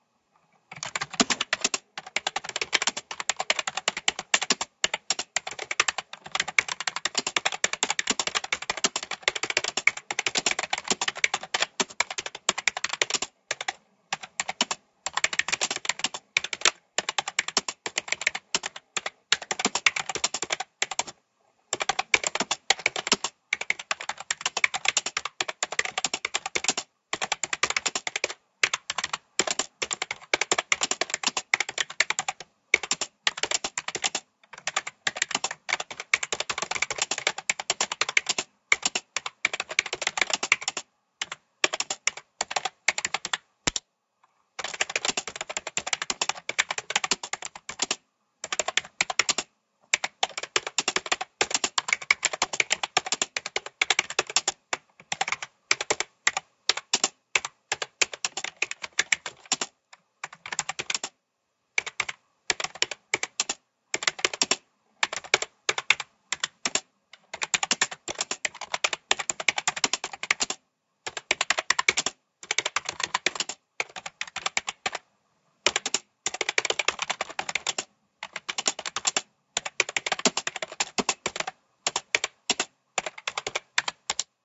Few (realistic) clicks on a keyboard. Go ahead and do whatever you want with this.
keyboard, type, click, computer, typing, key, keys